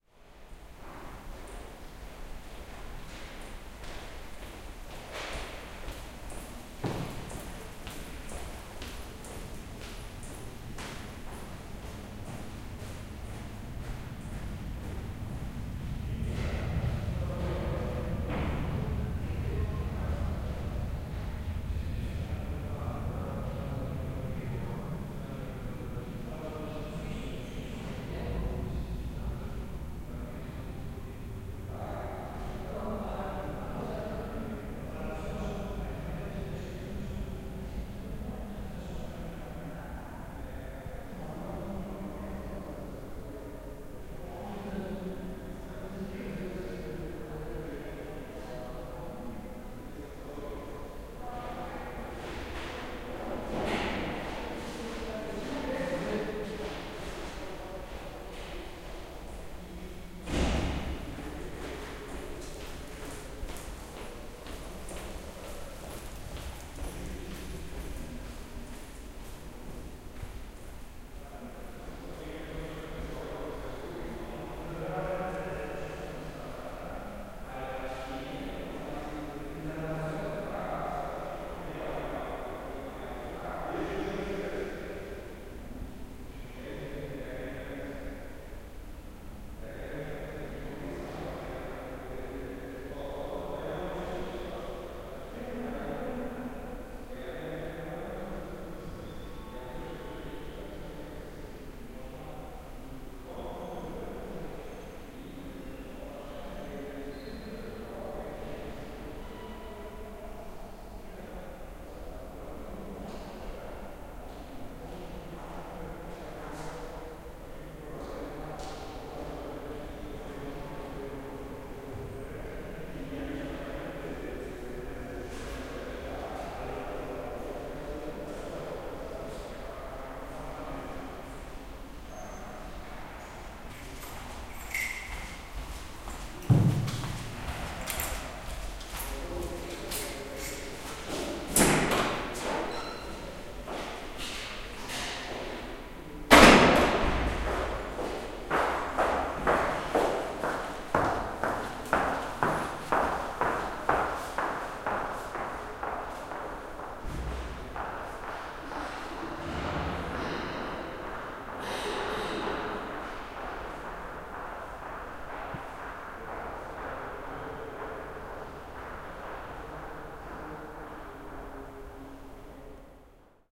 03.11.11: about 14.20. ambience of the one of corridors in the Cultural Center Castle in Poznan. echoizing sound of steps.